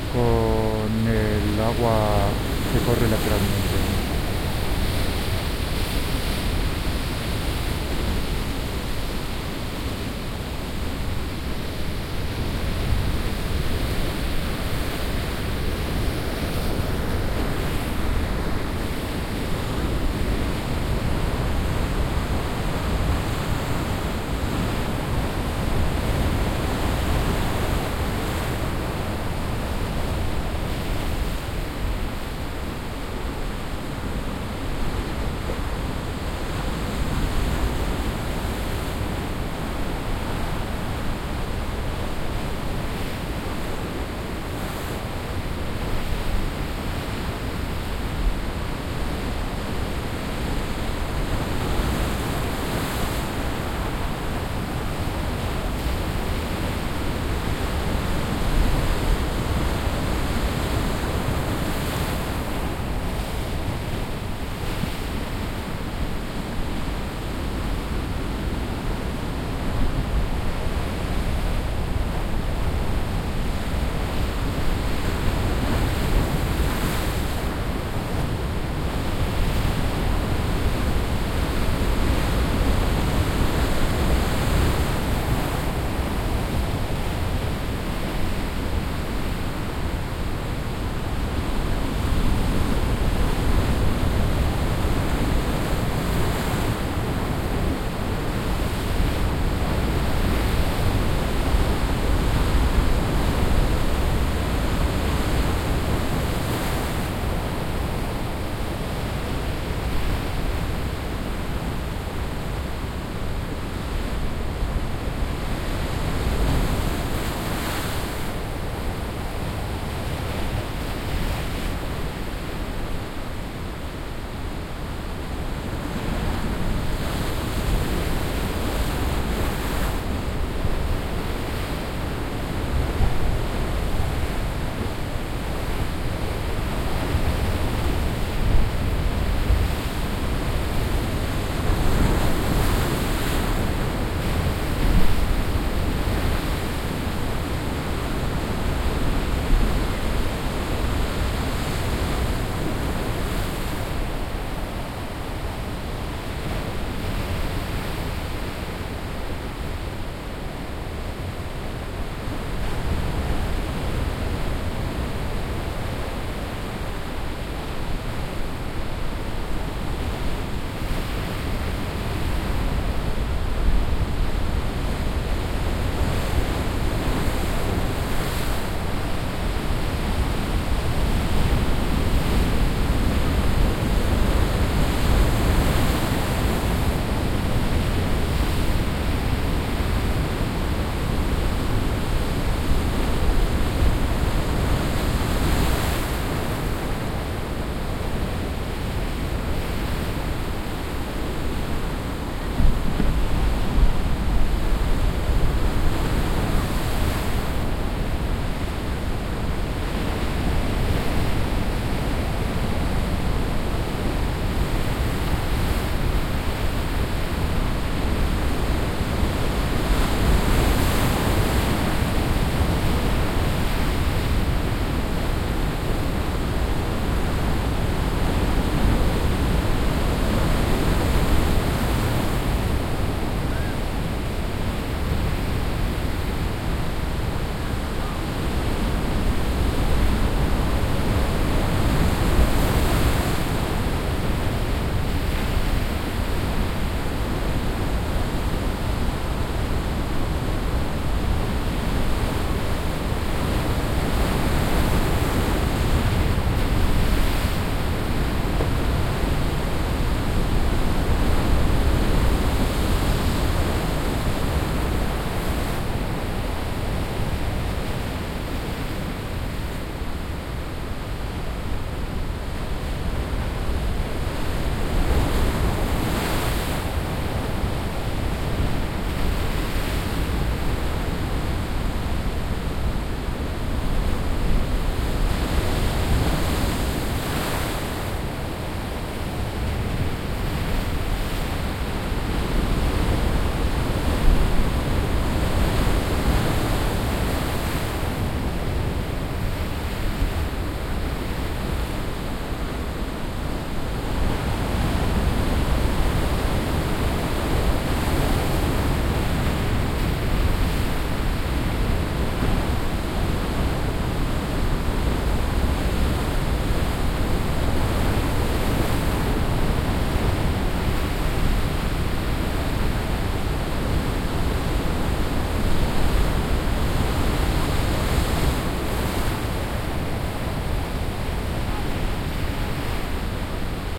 porto 22-05-14 waves during a storm, wind on sand beach
Breaking waves in a stormy day with wind, sand beach